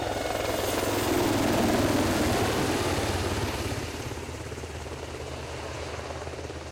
Army Apache helicopter (HELO) flying over a small field. Sweeping location is relevant. Some doppler effect is present as well.
apache, chopper, helicopter, helo